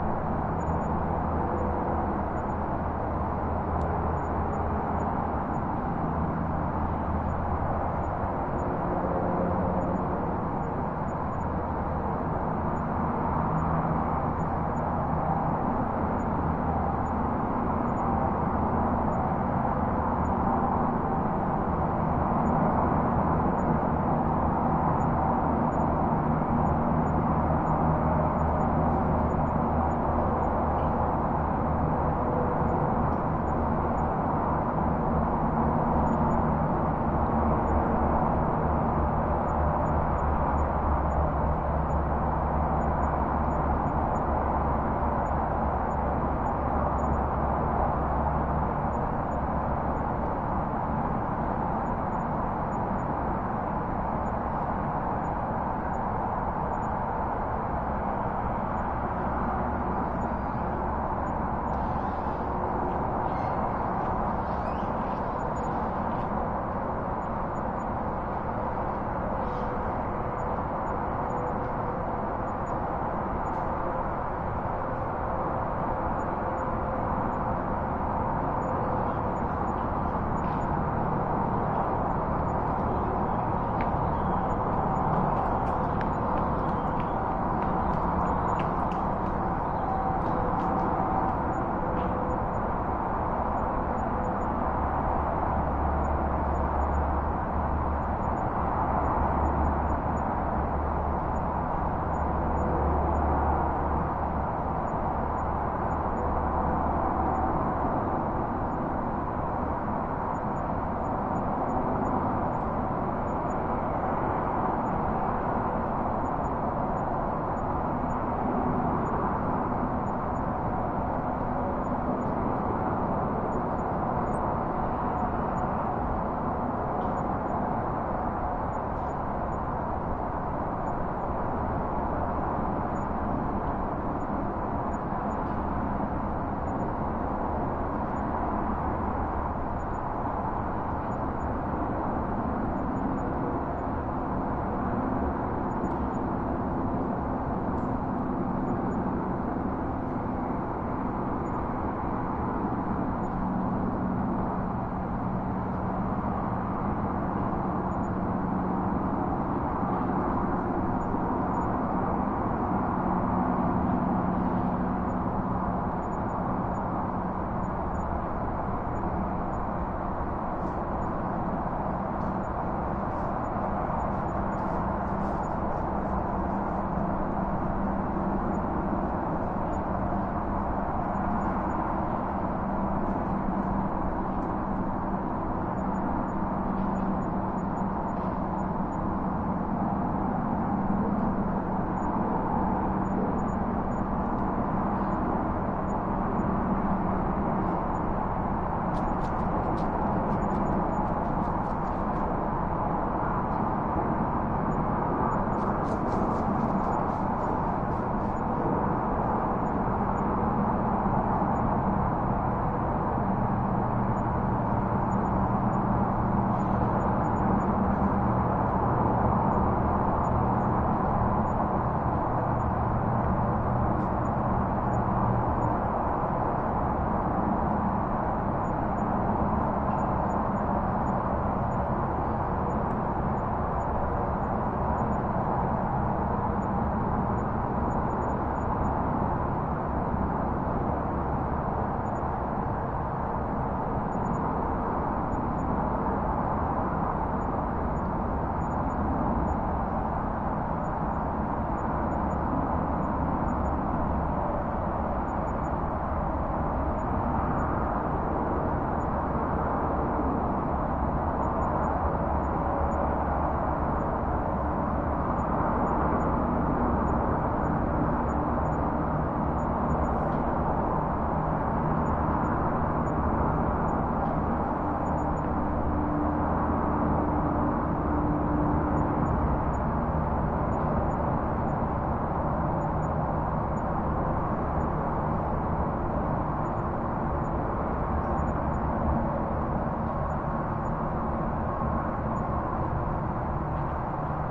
skyline highway traffic distant far or nearby haze from campground with light crickets and some distant camper activity
campground, haze, highway